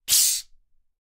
Bicycle Pump - Plastic - Fast Release 13
A bicycle pump recorded with a Zoom H6 and a Beyerdynamic MC740.
Gas, Pressure, Pump, Valve